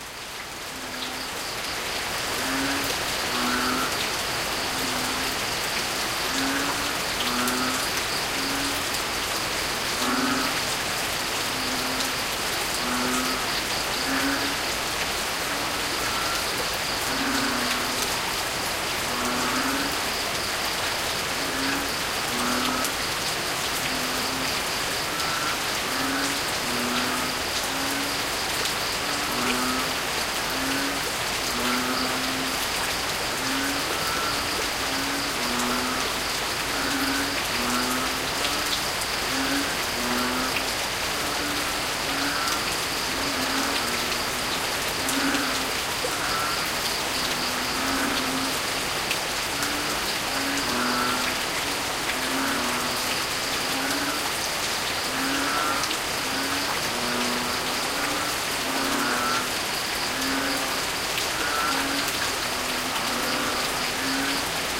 Frogs InHeavyRain
Recorded at night with Canon S5IS from our window during heavy rain. Bgy San Jose, Puerto Princesa, Palawan, Philippines.
puerto-princesa, frogs, palawan, frog, field-recording